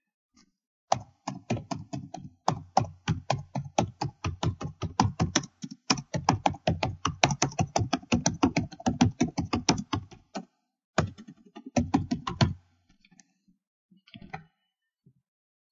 tecleo - keyboard
keyboard teclas tecleo
teclas, keyboard, tecleo